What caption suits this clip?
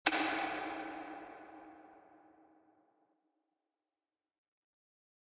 16582 tedthetrumpet kettleswitch1 (remix)
I took ted the trumpets switch and added an echo to make it sound like a fluorescent light turning off in a warehouse.
echo, switch